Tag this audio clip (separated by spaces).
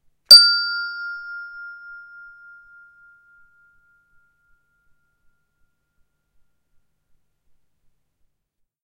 bell bells bright f hand instrument percussion single